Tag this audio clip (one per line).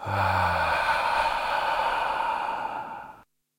breath
gasp